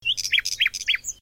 Bird Cherp 27
Bird, Field-Recording